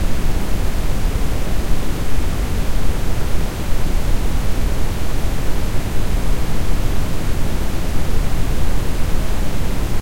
BROWN NOISE-10s
Soft, dual-channel brown noise with a negligible delay between channels. Generated in Audition.
brown-noise, generated, ab, static, soft, noise, glitch, fx, electronic, hi-fi, digital, brown, lo-fi